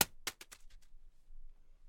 This recording is of a crumpled paper ball being thrown to the ground.
wastepaper, ball, woosh, thrown, swoosh, drop, paper, throw, crumpled